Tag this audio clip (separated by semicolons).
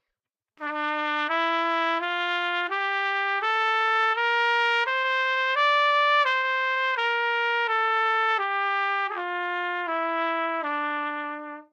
scale; Csharpnatural; minor; neumann-U87; trumpet; good-sounds